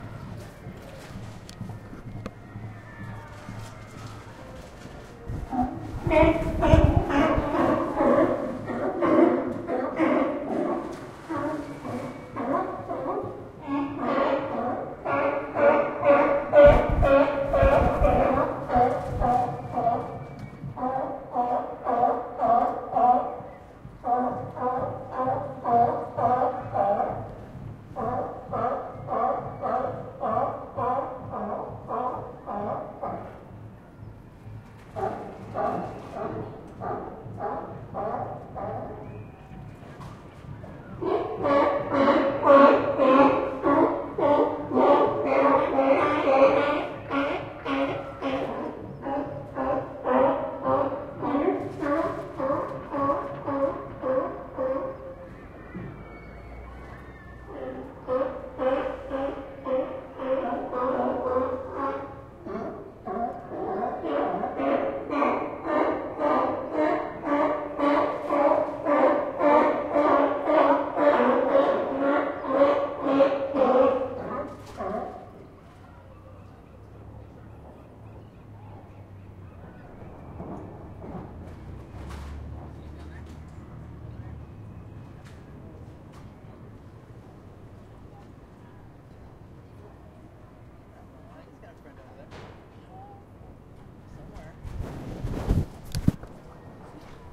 Recorded on the municipal wharf at Santa Cruz, California on 20 Oct 2012 using a Zoom H2. Sea lions calling. These sea lions live at the wharf. In the distance, sounds from the amusement park and from a marching band contest.